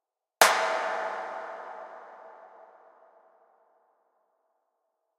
Clap Reverb FX 1
Clap reverb bomb FX 1, mainly treble quite long reverb tail.